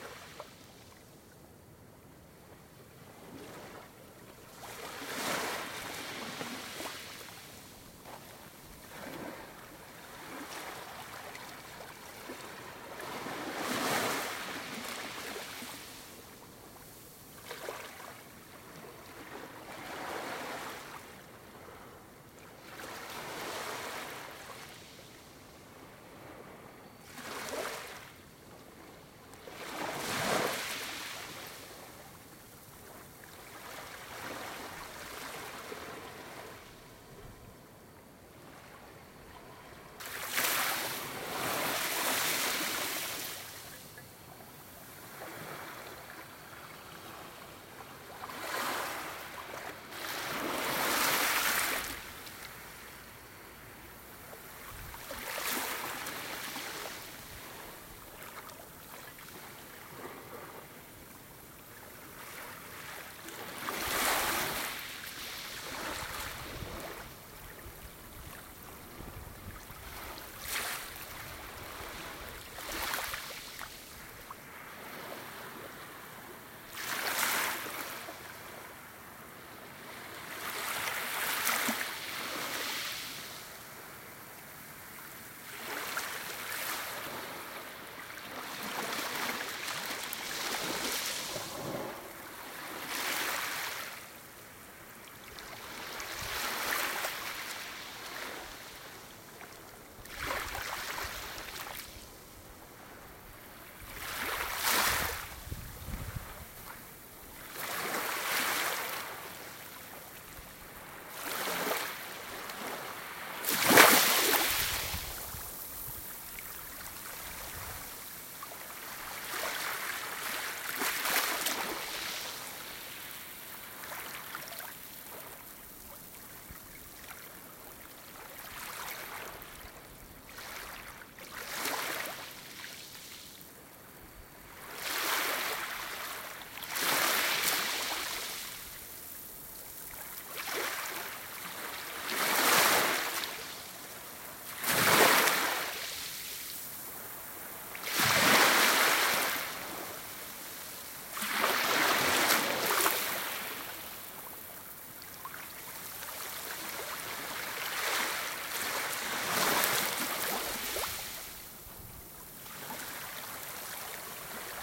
Gentle small waves lapping on shore
very close-mic recording of waves lapping on to a sandy shore.